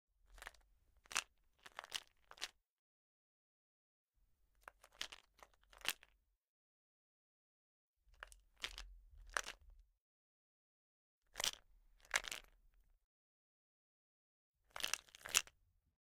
Studio recording of a plastic bottle of medication pills being rattled.
container, bottle, shake, pills, moving, medical, drug, plastic, shaking, medication, rattle, pill, flask